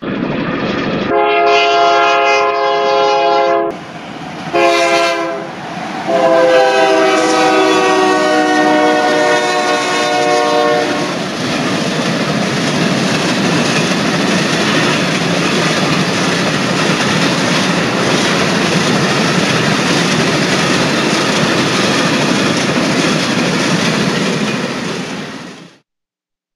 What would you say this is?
Train upon us
Train approaching quickly at 50 mph, whitsle blowing, has cool sound of whistle passing by, I continue to record the train